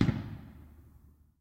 Explosion sound effect based on edited recording of fireworks on Bonfire Night circa 2018. Recorded using Voice Recorder Pro on a Samsung Galaxy S8 smartphone and edited in Adobe Audition.